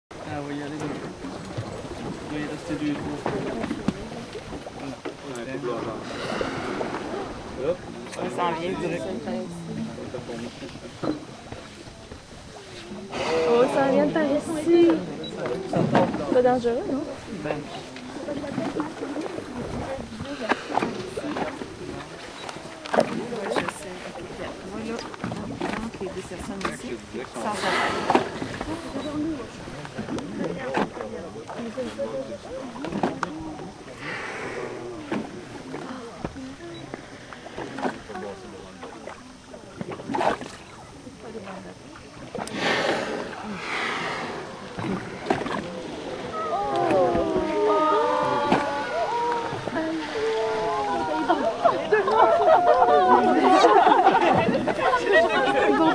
Onboard ambiance during a whale watching excursion at Parc National Forillon, at the very tip of Gaspe Peninsula in Quebec, Canada. You can listen to the whales breathing, as well as beeps from cameras and French speaking people in rapture. This was recorded with a Canon camcorder.